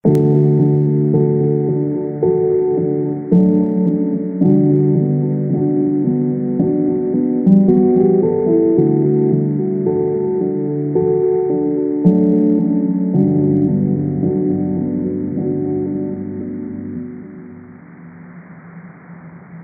110 thinking about you
free, natural-reverb, sound, piano, lofi, prepared-piano, depression